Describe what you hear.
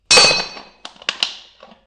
crowbar hit
glass on tin can
cellar, crowbar, hit, home, jerrycan, made, Plastic, shed, wood